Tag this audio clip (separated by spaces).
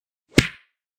fight-punch punch-sound-effect fist fight slap boxing-punch hit boxing punching punch